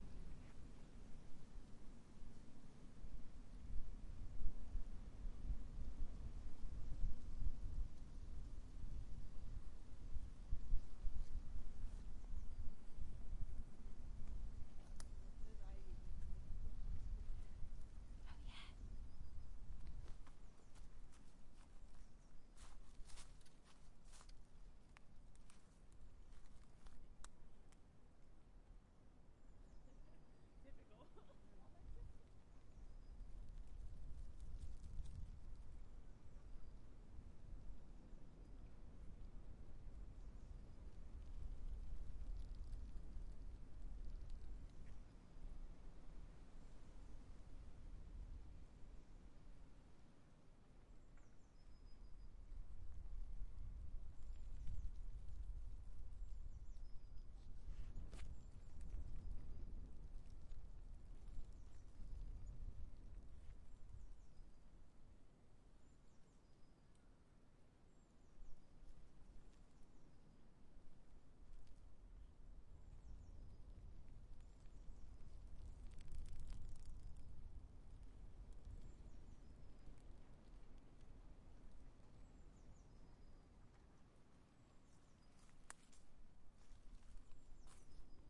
The sound of ivy rustling in the wind.